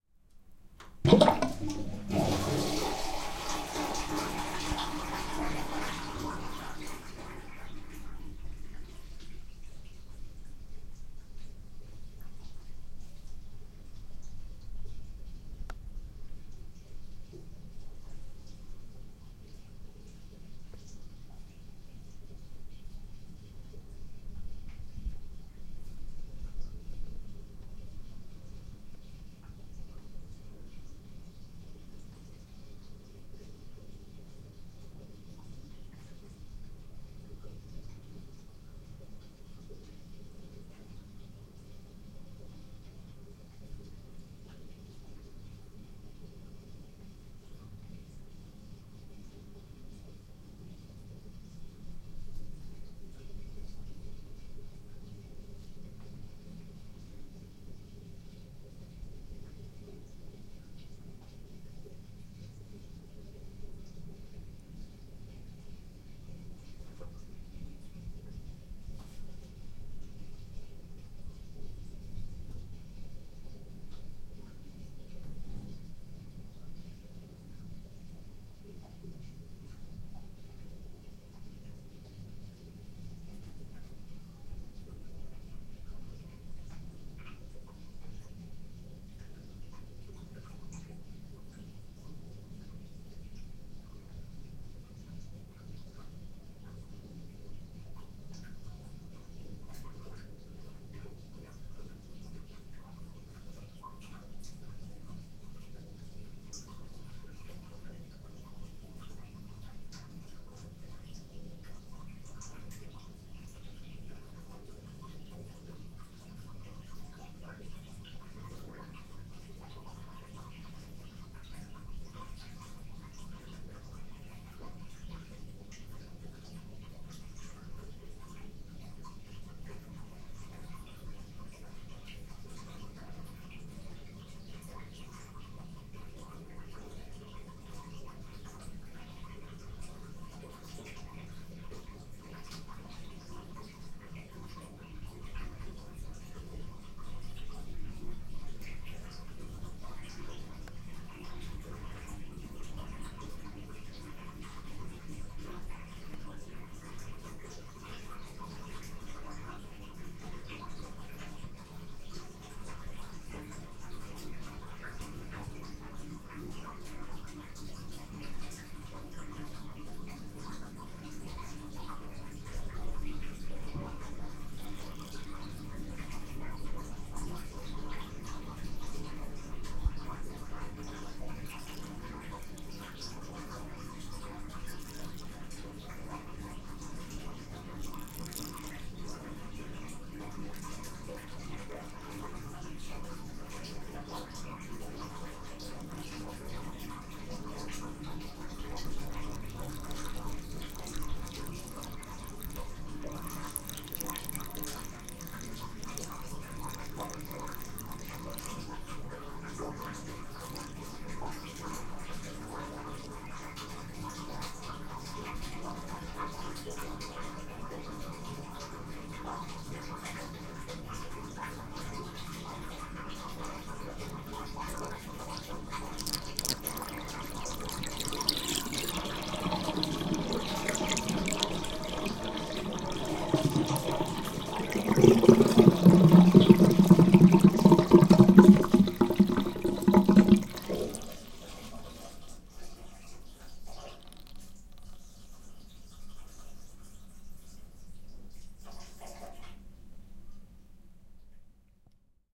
Wasser - Badewanne freistehend, Abfluss
Draining water from free-standing bathtub
free-standing, bathtub, drain, field-recording